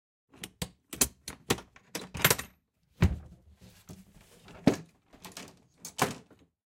Opening Antique Trunk - Latches and Opening
An attempt to fill a request for the sound of rummaging through a wooden chest (trunk) filled with wooden toys...this features the opening of the trunk and various latch/locks being used for opening/closing.
Gear: Zoom H6, XYH-6 X/Y capsule (120 degree stereo image), Rycote Windjammer, mounted on a tripod, late 1800s wooden trunk.